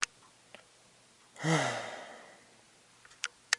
A person sighing deeply.